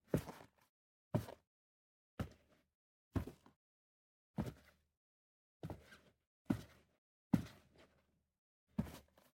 WoodType 1 Footstep LeatherBoot
AKG C414, Wood uknown, Leather Army Parade Boot
Boot, Foley, Footsteps, Leather, Steps, Walk, Walking, Wood